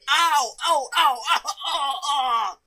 Ow oh oh oh
Owww... Recorded with a CA desktop microphone. Not edited.
agony, hurt, male, oh, ouch, ow, pain, painful, scream, screaming, shout, that-must-hurt, voice, yell